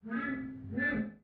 Recording the procedure of cleaning a mirror inside an ordinary bathroom.
The recording took place inside a typical bathroom in Ilmenau, Germany.
Recording Technique : M/S, placed 2 meters away from the mirror. In addition to this, a towel was placed in front of the microphone. Finally an elevation of more or less 30 degrees was used.